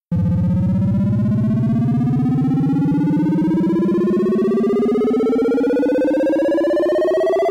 High Score Fill - Ascending Faster
Made for some motion graphics where a percentage was filling up and needed a sound to accompany that. This one is fast. Made using Reason.
high-score, bloop